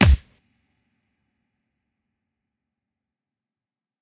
PUNCH-BOXING-04
06.22.16: A punch created from the sound of a leather glove being whipped, processed alongside a thickly-layered kick drum.
land, bass-drum, kick-drum, object, thump, hitting, slap, impact, low, kickdrum, hit, foley, metal, fat, tap, fall, boosh, bang, percussion, big, cinematic, thud, wood, dud, drop, punch, bass, kick, boom